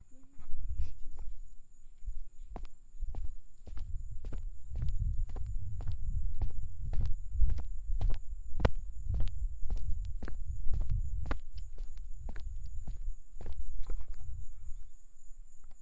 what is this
Walking on on concrete. Recorded on a DR07 mkII in Southwest Florida. Some wind noise with crickets in the background.
If you can, please share the project you used this in.